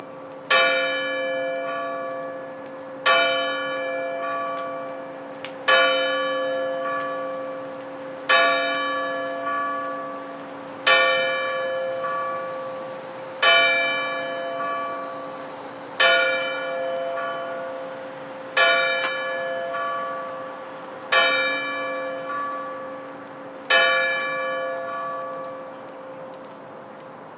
Church Clock Strikes 10
The church bell strikes 10 oclock
bell, bells, cathedral, church, church-bell, clock, ringing